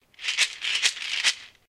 Tablets being shaken in a tub
shaking, Tablets, tub, shaked, shaken, tablet, pills, shake, rattle, rattling, pill